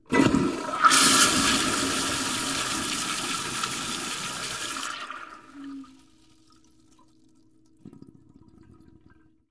Wingate504TankOff
This is a toilet from a hotel in Greensboro, North Carolina, United States, with no water supply. Recorded in April 2010 using a Zoom h4 and Audio Technica AT-822 microphone.
flush, glug, gurgle, toilet, water, wet